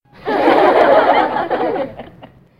Laugh Track 1
Apparently I made this for my animation which supposedly a parody of sitcom shows...and since I find the laugh tracks in the internet a little too "cliche" (and I've used it a bunch of times in my videos already), I decided to make my own.
So, all I did was record my voice doing different kinds of laughing (mostly giggles or chuckles since I somehow can't force out a fake laughter by the time of recording) in my normal and falsetto voice for at least 1 minute. Then I edited it all out in Audacity.
Thanks :)
laugh, sitcom, realistic, human, group, walla, male, short, chuckle, fake, female, laughing